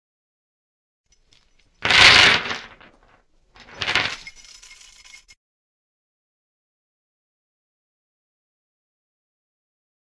chains effect
This is the easier to use version of the chain effect. It sounds like a heavy chain being dropped on a wooden floor and then being lifted and quickly tightened.
chain, drop, tighten